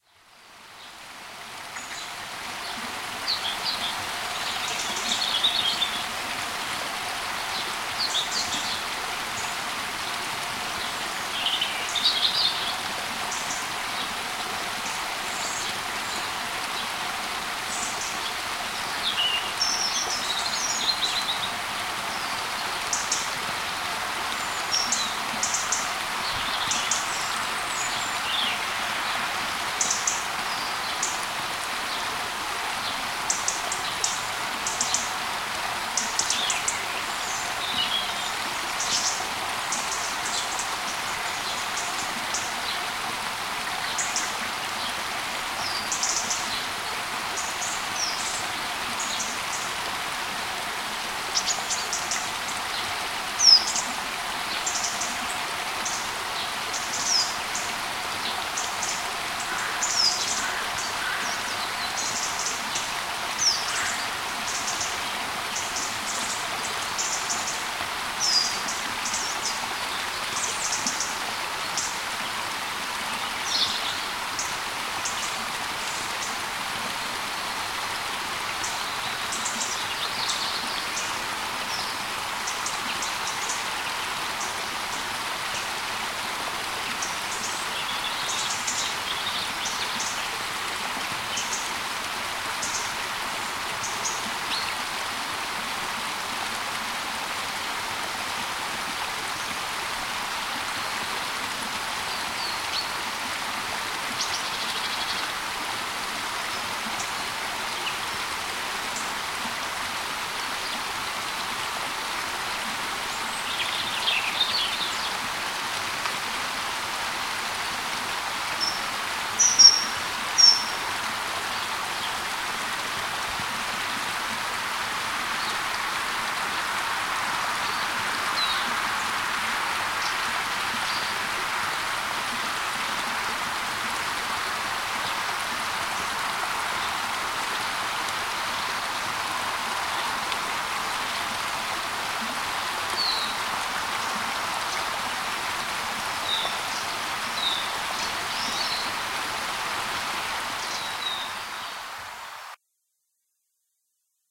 02.Path-To-Upper-Foyers
Recording on a path from the lodges in Lower Foyers to Upper Foyers next to a stream.
birds, field-recording, stream